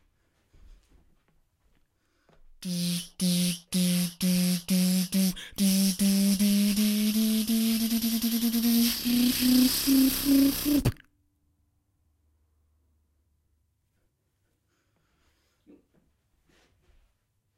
A simple build up I made by Beat-boxing
Beat-box, drums